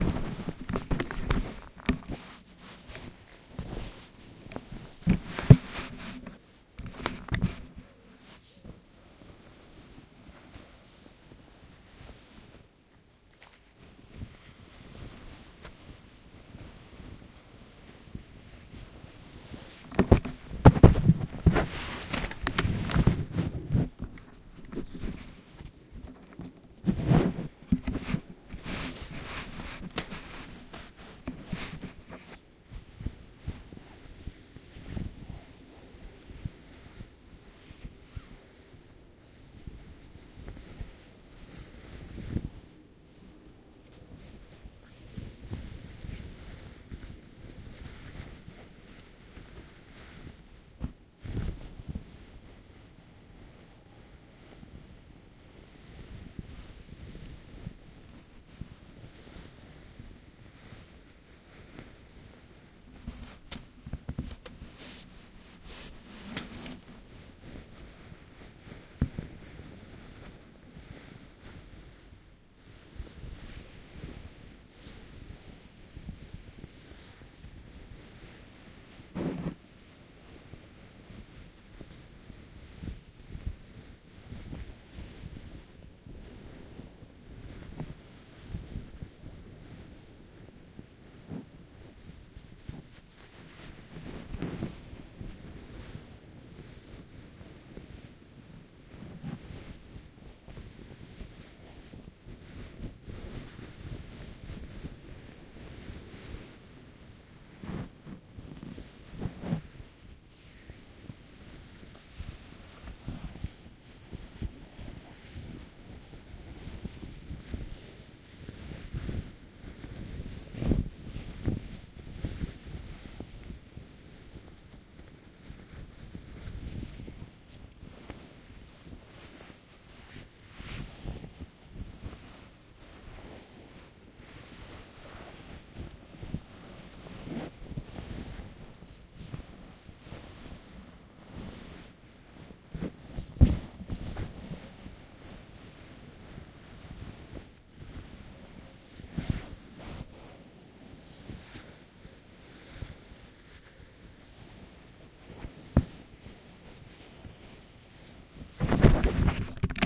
moving in bed sheets.
recorded with: Zoom H2n
bed, cosy, lakens, pillow, sheet, sheets